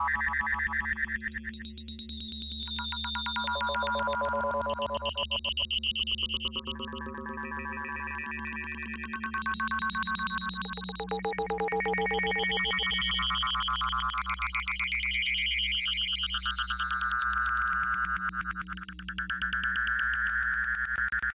grainulated awesomeness2
awesome synth fart i made with granuizing a bass sound
grain, fart, granulized, bass, synth, granulated